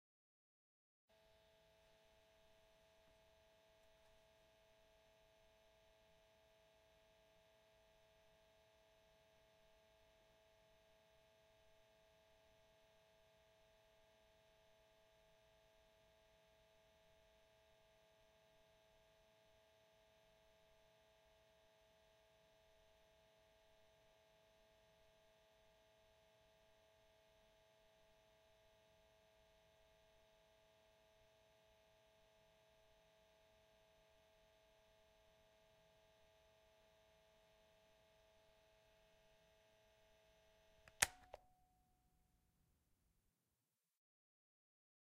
Sound of the Cassette Tape Motor